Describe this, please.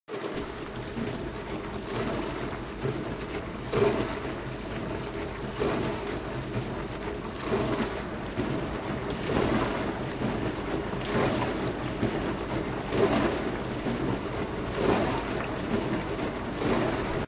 Short dishwasher sound clip
dishwasher, machine, household